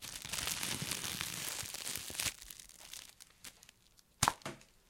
Plastic bag crumple and pop
cloth, crumple, paper, plastic